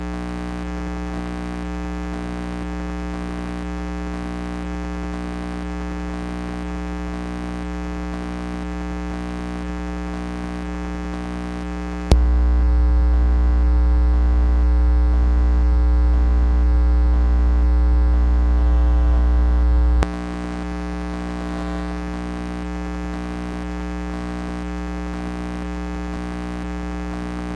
Phone transducer suction cup thing on various places on an alarm clock radio, speakers, desk lamp bulb housing, power plug, etc. Recordings taken while blinking, not blinking, changing radio station, flipping lamp on and off, etc.
magnetic, transducer, buzz, electro, hum, electricity